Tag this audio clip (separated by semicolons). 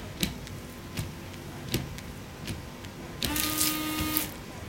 cleaning; car; screens; windows